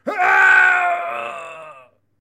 Man Scream1
voice, man, Grunt, Scream